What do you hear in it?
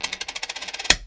onid coin drop stop
Just some random sounds I sampled over the weekend. Might be good in an IDM kit or some such.
click, coin-drop, snap